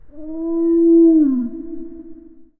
Part of a Song Thrush call, reduced speed (15%)